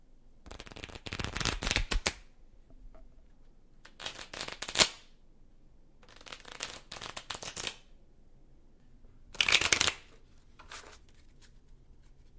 A composite sound of dealing cards, shuffling cards and flipping the cards all at once onto the floor. Recorded onto a SonyMD with a Sony ECM-99 stereo microphone.